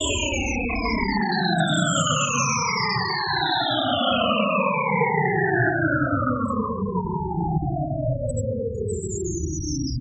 Coagula Science! 5 - Shutdown
Synthetic sound.
Made in Coagula.
shutdown, starwars, powerdown, ship, starship, fiction, motor, epic, sci-fi, star, scifi, startrek, down, science, engine, energy